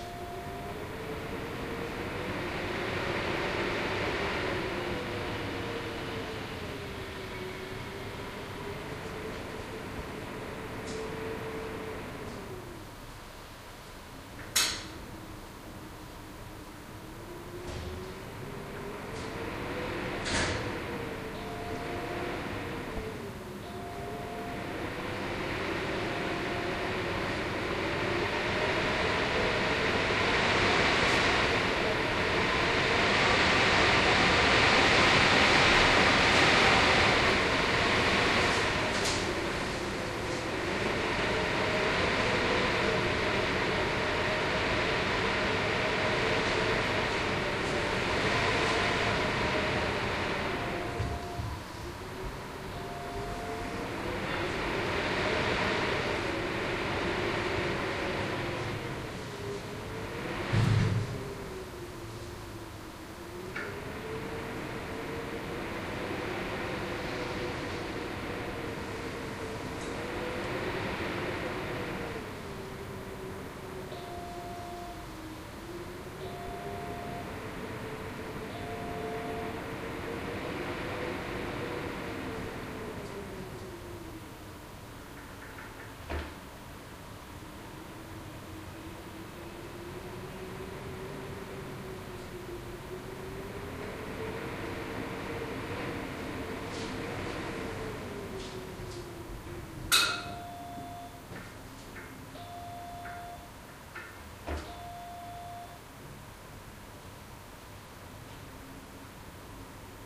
WIND IN MUSEUM LIFTSHAFT
The sound of wind recorded in a museum lift shaft early evening, on a very stormy day. Doors creaking open and banging shut, an electric fire's thermostat clicking on and off, and also the noise from the lift's calling system can be heard.